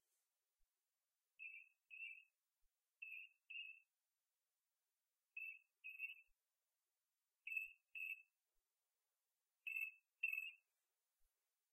060209 elec cricket 02
The sound of a low-def electronic sampler being played at different places in a room. The sample recorded in it sounds a bit like a cricket.